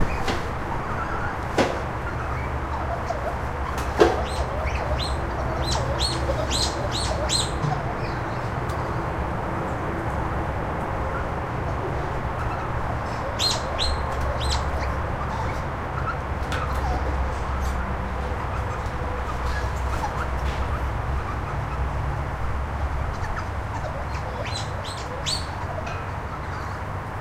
white cheeked gibbon chatter
A White-cheeked Gibbon chattering and squeaking while swinging around. Recorded with a Zoom H2.